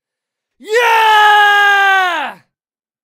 YEAH! - Warcry, British Male
A British military character shouting triumphantly in battle.
Perfect for a young warrior, hardened fighter, a fierce knight, or even someone in a crowd of people.